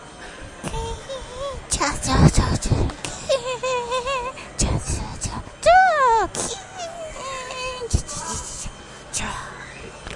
Vietnam TM TE01 TanSonNhatInternationalAirport
Airport, Tan-Son-Nhat, Texture